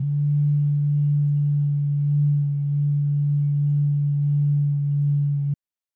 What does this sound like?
Recorded with a Zoom and a Zoom MSH-6 MS Capsule, an audio file of a man blowing into a bottle. Recorded in a small room.